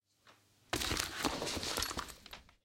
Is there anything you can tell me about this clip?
03 crash into a crate
Cat crashing into a crate